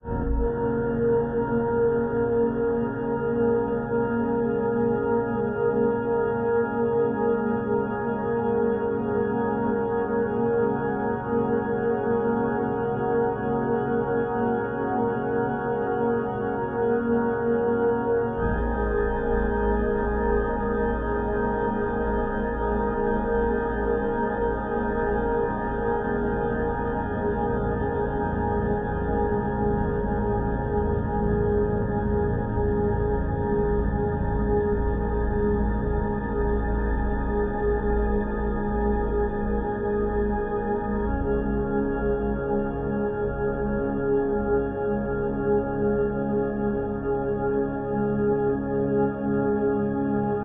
ps Lies of peace
Drone made in Paulstretch. Sudden timbre changes and uncanny harmonics undermining otherwise peaceful atmosphere.
drone, eerie, Paulstretch, peaceful, sinister, suspense